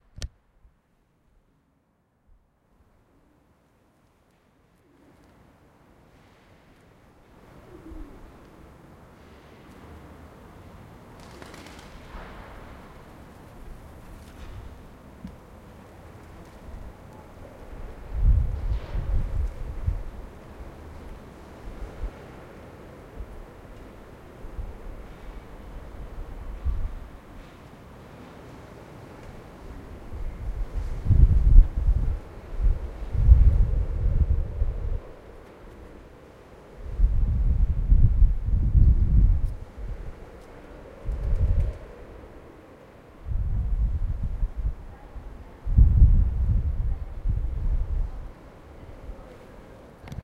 Cannock Soundscape. Recorded on 23/05/2020 at 6pm.
ambient, cannocksoundscape, ambience, cannock, ambiance, atmosphere, soundscape, background